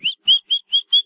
Me making bird sounds :-)